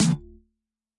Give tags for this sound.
experimental
kit
noise
samples
sounds
idm
hits
drum
techno

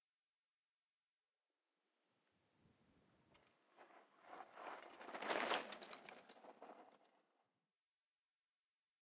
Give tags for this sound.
terrestrial
pedaling
street
freewheel
bike
bicycle
park
downhill
approach
rider
ride
chain
whirr
jump
wheel
click

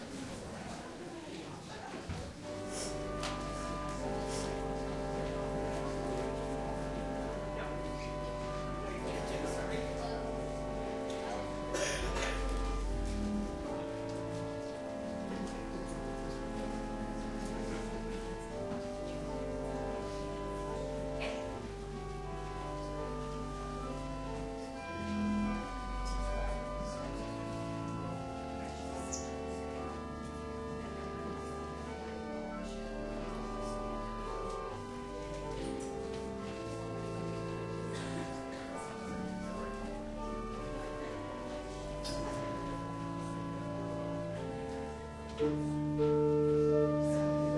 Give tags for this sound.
organ
church
congregation

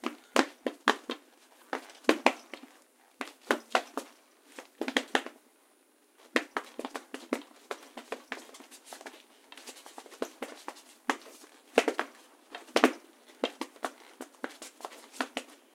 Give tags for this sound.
Action
Battle
Fight
Foley
Shoes
Shuffle
War

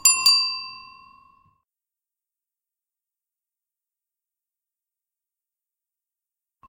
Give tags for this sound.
bike
bicycle
ring
cycle
bell
ride
rider
pedaling

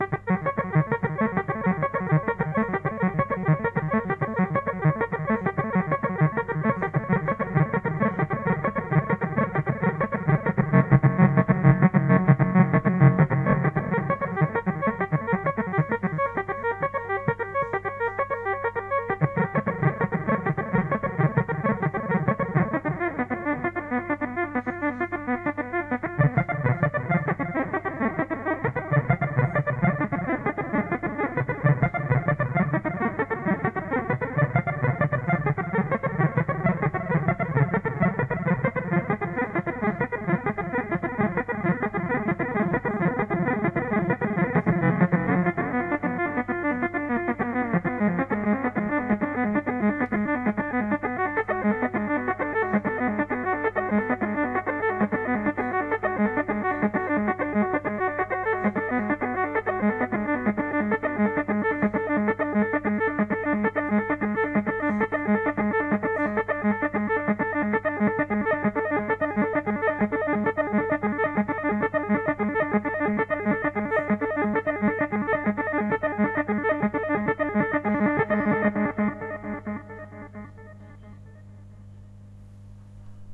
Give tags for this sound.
synth
Roland
sequence
insane

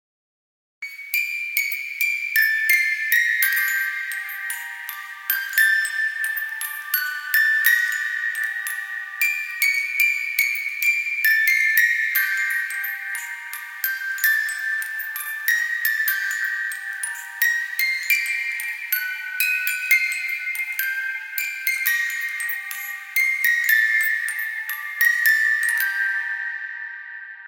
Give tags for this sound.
anxious
background-sound
bogey
field-recording
for-elise
ghost
handorgan
haunted
little
scary
sinister
suspense
thrill

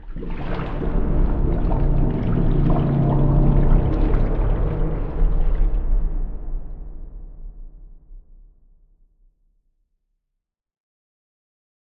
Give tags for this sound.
bubbles
monster
octopus
shark
splash
swim
water

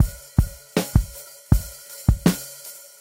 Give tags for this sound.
160-bpm
Acoustic
Drums
Funk
Half-time
Loop
Rock

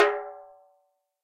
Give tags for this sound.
African
Darabuka
Djembe
Doumbec
Egyptian
Middle-East
Silk-Road
Tombek
drum
dumbek
hand
percussion
stereo

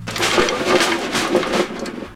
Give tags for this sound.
collapse
collapsing
drum
drumsticks
earth
earthquake
falling
motion
movement
moving
noise
quake
rattle
rattling
rumble
rumbling
shake
shaked
shaking
shudder
snare
sticks
stirred
stutter
suspense
waggle
wood
wooden

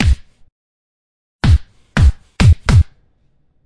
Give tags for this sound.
attack
fight
fist
hit
impact
punch
slap
smack
violence
wack
whack
whip

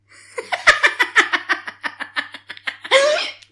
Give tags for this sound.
grandma
laugh
witchy
granny
witch
female
woman
girl

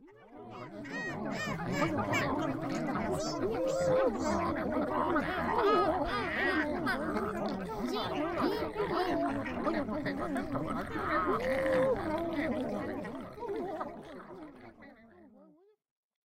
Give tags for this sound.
babbling
creatures
minions
talking
voices

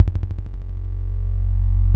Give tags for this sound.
tone
industrial

